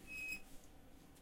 Shower knob turning off